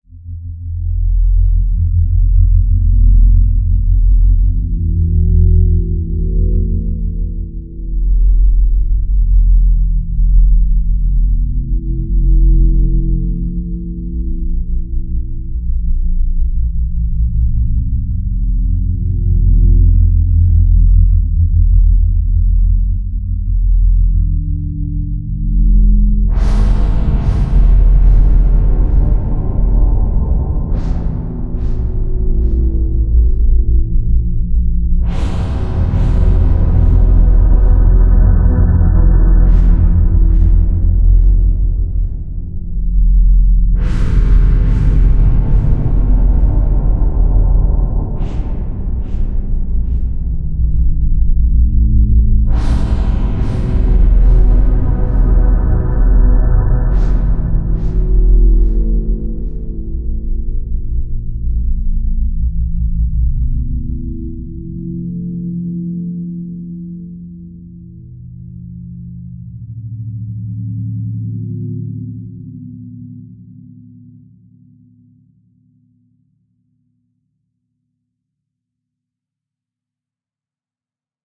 Drone horror music #1
ambiance, Ambience, Ambient, Atmosphere, Creepy, Dark, Dreamscape, Drone, Eerie, Evil, Ghost, Horror, Scary, Sound-Design, Spooky, Strange, Wave, Wind